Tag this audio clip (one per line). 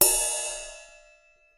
cymbal
perc
percussion
ride